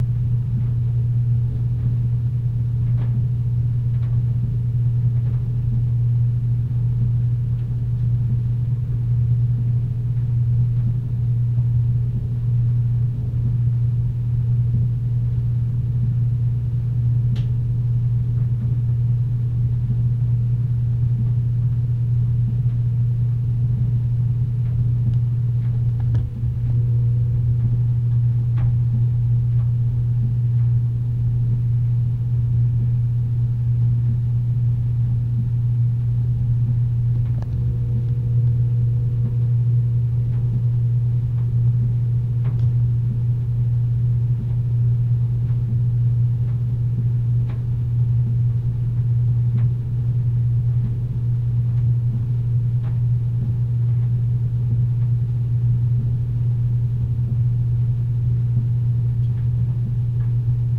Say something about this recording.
Attempting binaural recording in laundry room with laptop and headphones in stereo mic jack. There is no stereo line in on laptops? Lame.
noise, lofi, test, binaural